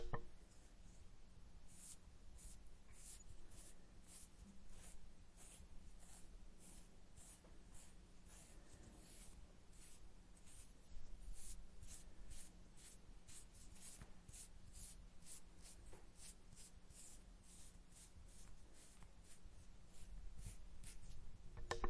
Fine tooth comb brushing short hair, close. Faint breathing also.